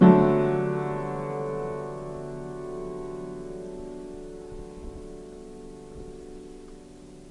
Me and a friend were allowed access into our towns local church to record their wonderful out of tune piano.